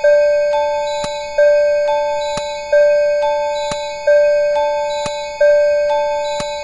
9oBpM FLoWErS Evil Creams - 5
Another ambient loop with a percussive sound. Loopable @90bpm.